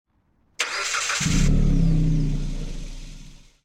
Car Engine Starting
Noise starting the car engine.
2 microphones: 1) on the hood 2) on the deafener
Both tracks are mixed.
Recording by Sennheiser ME80 & Oktava MD-380